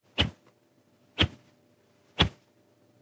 Whoosh (tiny)
3D, doppler, fly-by, flyby, pass-by, small, spatial, swish, swoosh, transition, whizz, whoosh, woosh